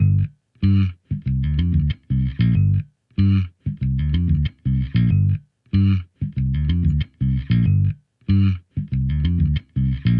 Gminor Funk BassGroove 94bpm
G Minor Funk Bass Groove
70, Ableton-Bass, Bass, Bass-Loop, Bass-Samples, Beat, Drums, Funk, Funk-Bass, Funky-Bass-Loop, Groove, Hip-Hop, Loop-Bass, Soul, s